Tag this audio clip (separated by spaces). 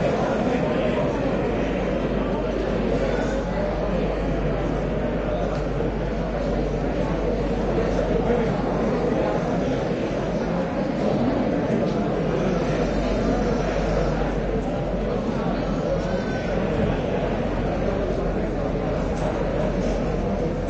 arrival international hall binaural indoor airplane field-recording ambience baggage journey plane trip airport passenger person terminal environment train transport ambient tourism aircraft speech record jet travel tourist traveler departure vacation